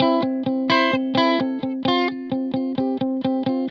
guitar recording for training melodic loop in sample base music